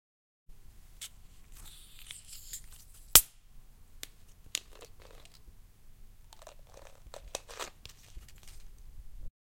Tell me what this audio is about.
Opening Closing Bottle 2
Sound effect of a plastic carbonated bottle being opened and closed.